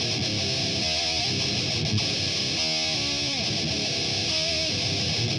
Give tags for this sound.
heavy rock thrash

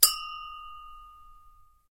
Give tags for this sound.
clinking glass glasses wine

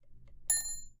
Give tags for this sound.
Elevator Sound

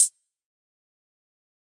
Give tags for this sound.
studio fl loops short hat recording fruity

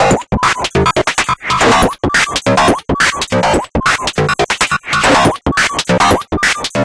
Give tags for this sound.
melody awesome hit loops game drum samples sounds video sample synth chords digital synthesizer music loop drums 8-bit

